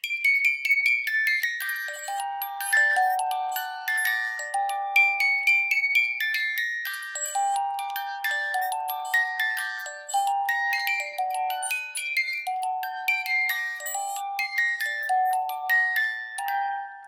My music box from Turkey playing a beautiful soothing song!
Music-box, song, turkey